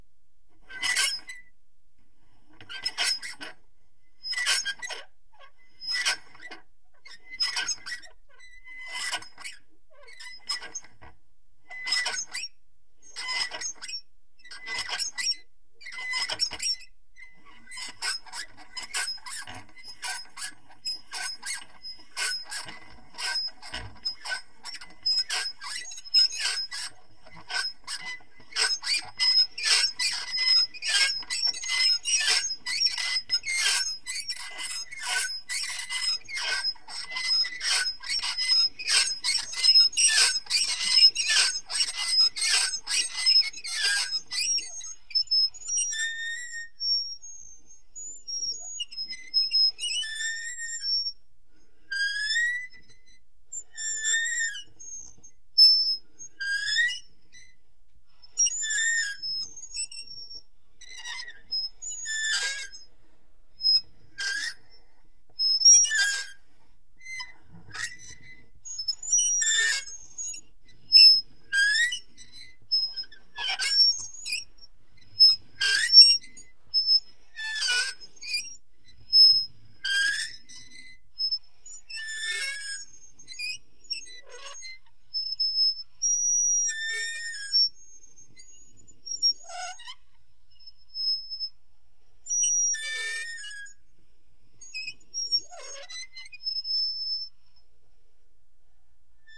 I recorded these sounds made with a toy meat grinder to simulate a windmill sound in an experimental film I worked on called Thin Ice.Here are some variations of speed and rhythms with a meat grinder.